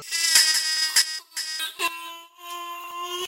Something made in waveform, originally me saying "hello" edited so heavily that I found it sorta catchy, (all traces of "hello" have been lost) so there you go.
beat, test, abstract